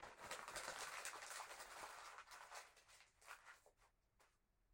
printer paper crushed